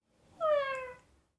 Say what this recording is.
20) Kitty cry
foley for my final assignment, an attempt at a cat crying
foley, meow, cat, cry, kitty